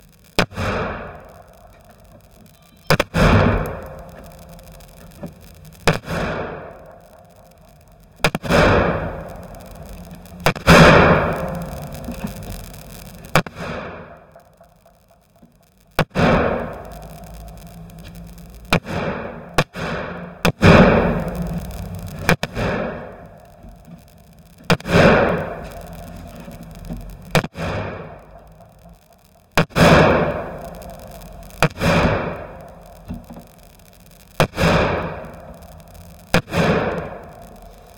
Wood Hit 01 Crunkulator
The file name itself is labeled with the preset I used.
Original Clip > Trash 2.
bang, boom, cinematic, distortion, drop, explosion, hit, horror, impact, industrial, percussion, percussive, pop, pow, processed, saturated, scary, sci-fi, shield, smack, strike, trashed